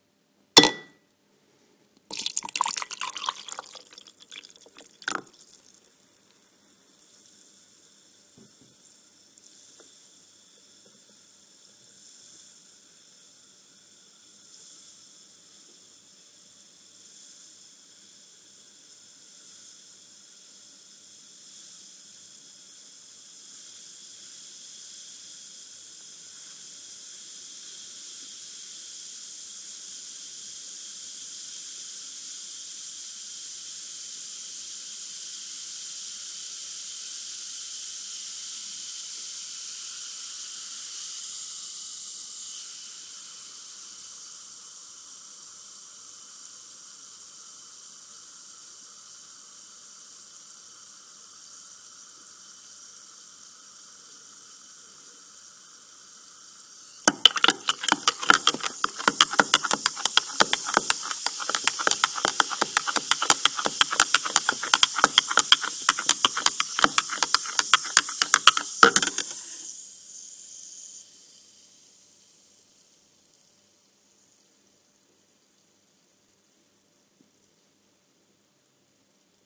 Pastilla Efervescente Effervescent Pill
This is a effervescent Pill dissolving in water.